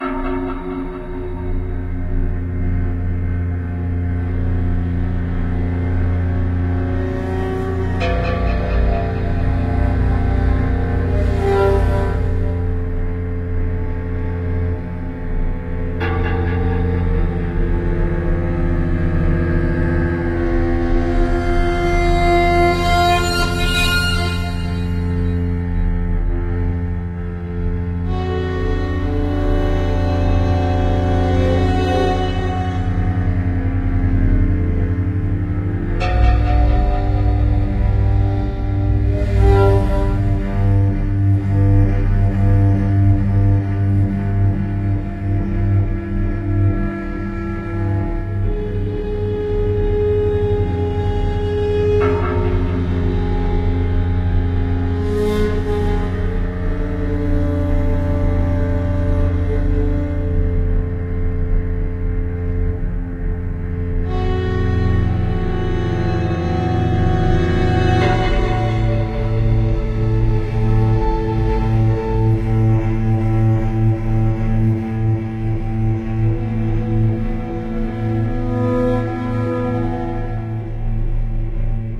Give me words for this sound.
Slow Time Waiting Snow - Orchestra Drama Sad Mood Strings String Drone Synth Cinematic Music Surround
Film, Drone, Drama, Synth, Atmosphere, Cinematic, Ambient, Slow